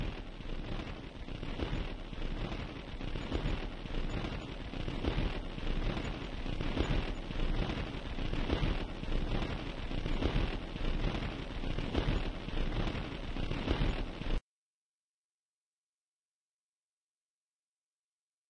these are endgrooves from vinyl lp's, suitable for processing as rhythm loops. this one is stereo, 16 bit pcm
end-groove, noise, raw